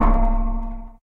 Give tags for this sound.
percussion
effect
electronic

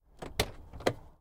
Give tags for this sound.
bulky
door
opening
car
open

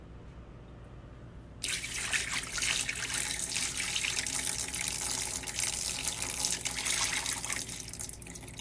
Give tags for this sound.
trickle
water
liquid
dripping
splash
drip
washing

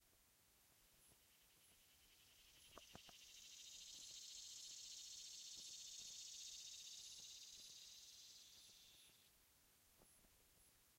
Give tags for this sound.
bug
buzz
cicada
hum
insect
summer

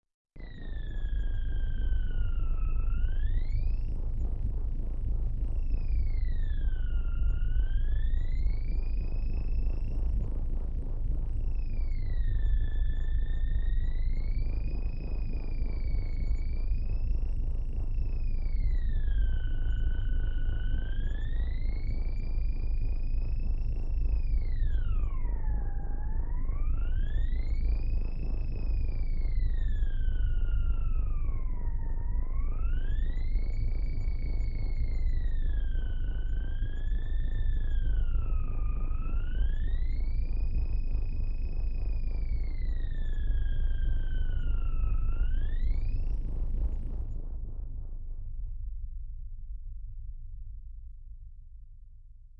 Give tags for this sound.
space
electronic
noise
starship
ambient
atmosphere
energy
pad
hover
ambience
emergency
bridge
impulsion
future
deep
machine
soundscape
spaceship
background
drive
fx
rumble
sci-fi
drone
futuristic
sound-design
engine
effect
Room
dark

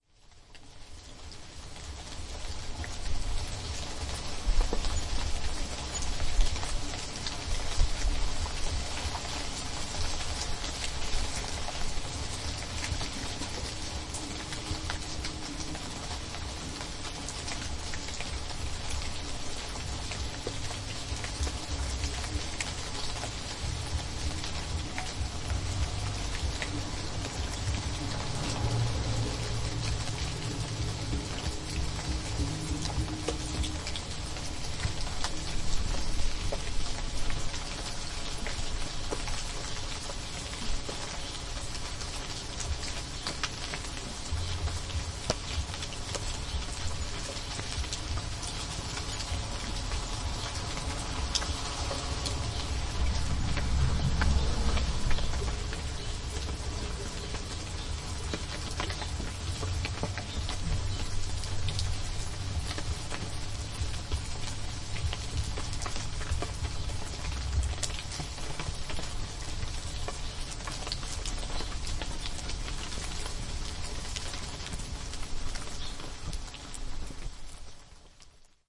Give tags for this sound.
Ambience
Nature
Splash
Tree
Weather

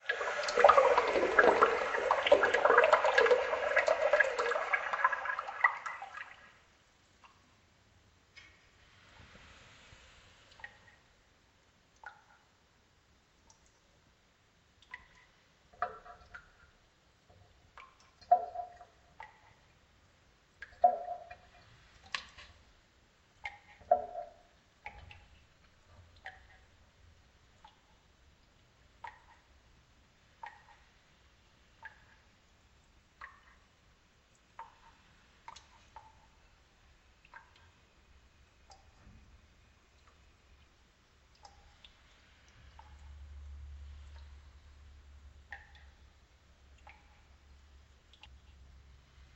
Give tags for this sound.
echo
Cave

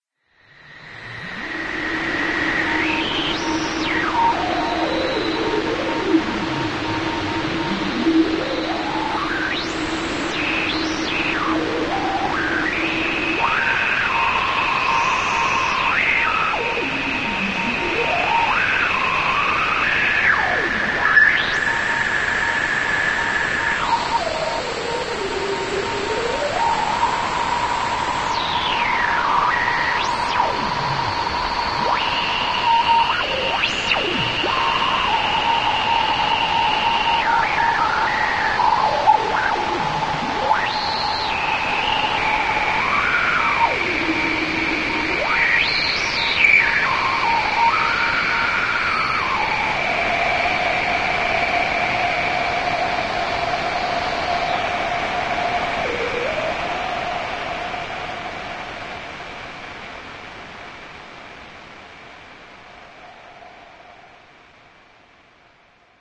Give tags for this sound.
radio
static
morse
tuner
whistle

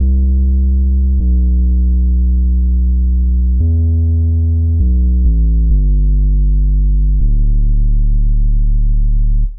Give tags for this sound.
bit
crushed

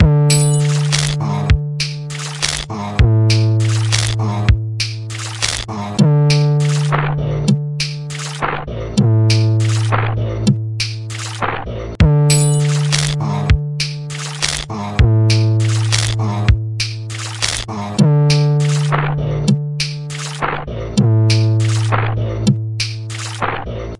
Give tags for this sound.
arranged
editing
sounds
vsts

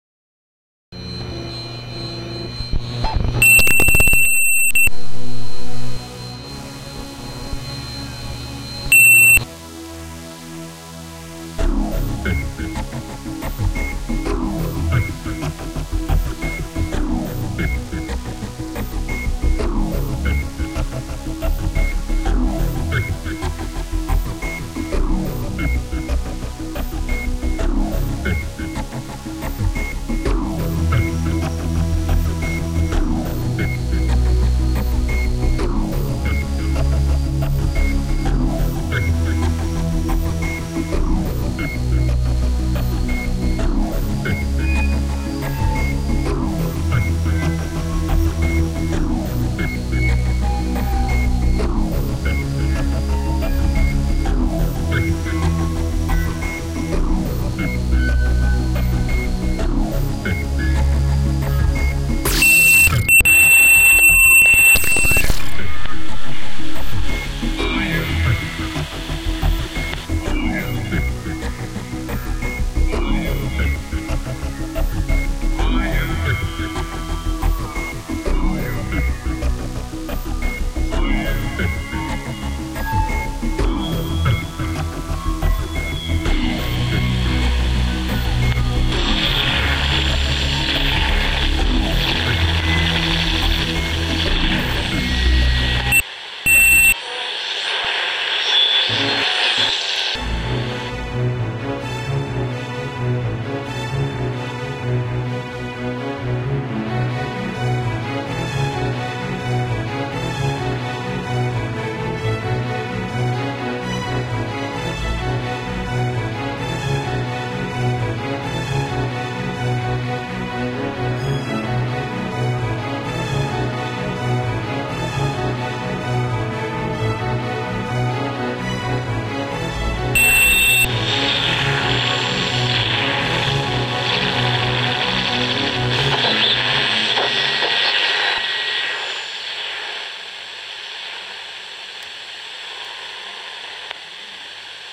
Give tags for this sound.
alien
Dog
horse
King
pizza
space
SUN

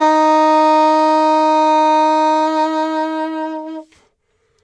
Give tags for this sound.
alto-sax
jazz
sampled-instruments
sax
saxophone
vst
woodwind

